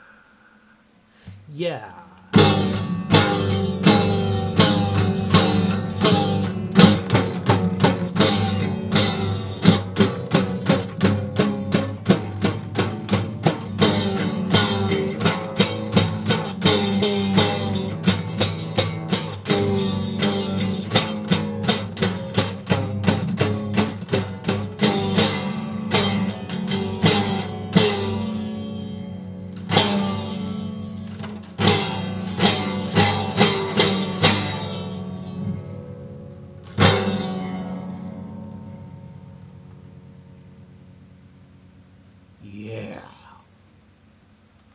Fooling around on a horribly out of tune guitar. Recorded on a cheap microphone.
acoustic, awful, guitar, out-of-tune